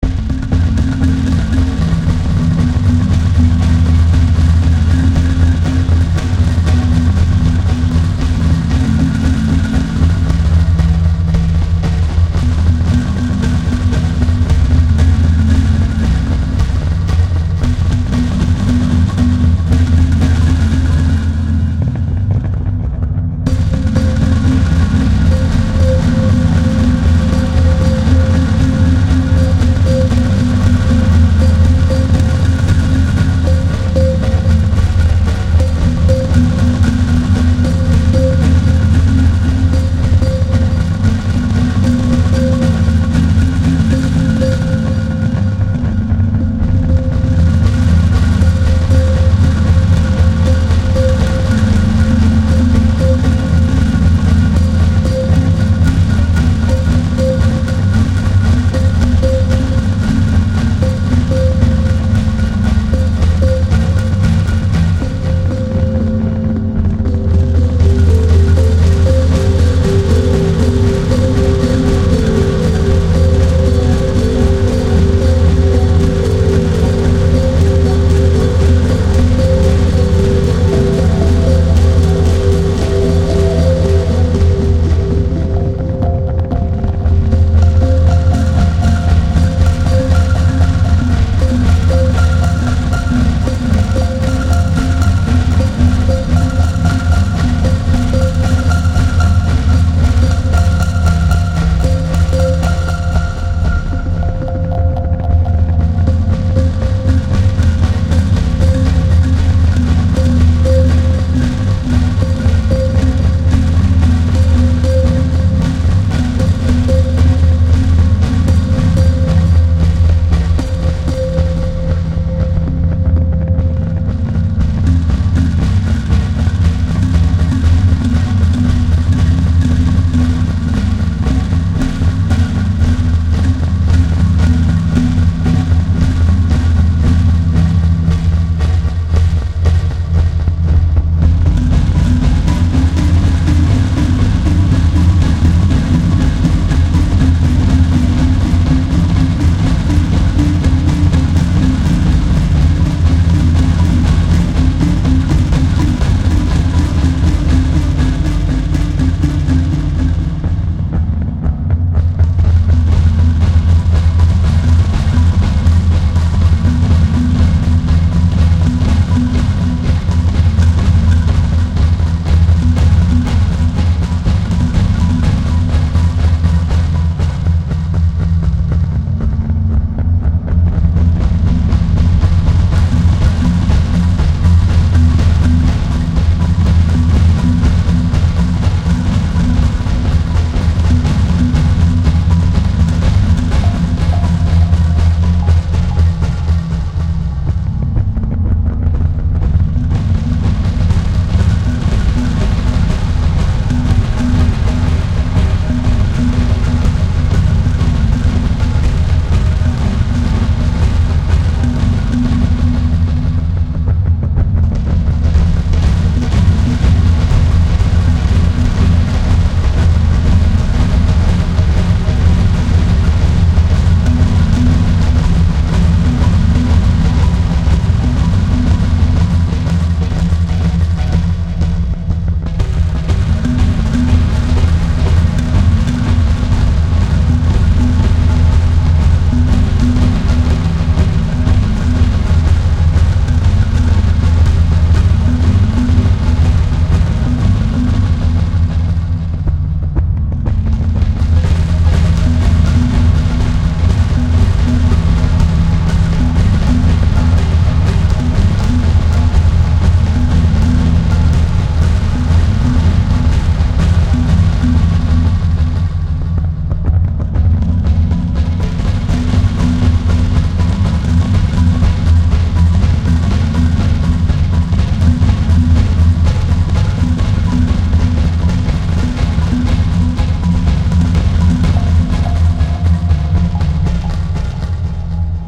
Caution headphone wearers. This is a big sound with lots of reverb that adds syncopation. Has a variety of changing rhythms and a totally human sound because the drum loops are made from keyboard midis processed through the daw's virtual synth. Hope you enjoy it.